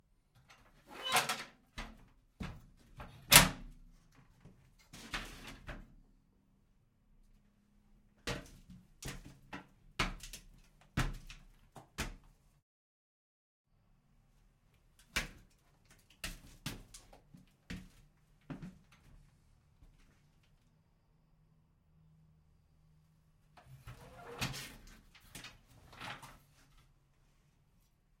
Open ladder, climb the ladder, down the ladder, close ladder.